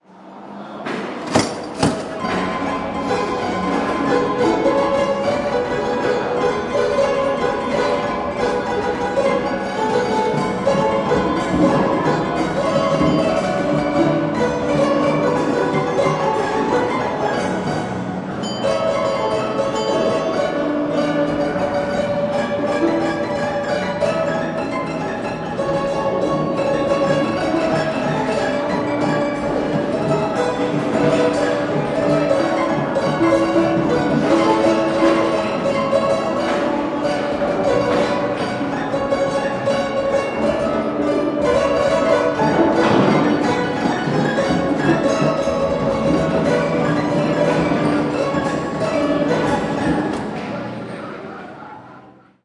MM Project - 9 Uzzeni Piano Orchestrion
Musée Mécanique recording project - 9 Uzzeni Piano Orchestrion
"This instrument was built in 1896 by P. Uzzeni of Pontarlier. It is driven by a spring motor with power transmission to a gear that turns the barrel. A centrifugal-force regulator governs the speed to within a maximum tolerance of 7%. A coin mechanism releases the action. It is played with a pinned barrel of which there are four". A Buchner